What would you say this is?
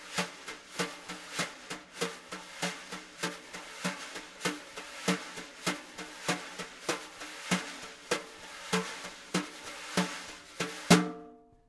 A snare drum played with brush sticks by my friend Mark Hage. Unprocessed, some room ambience but not too much. (98 bpm)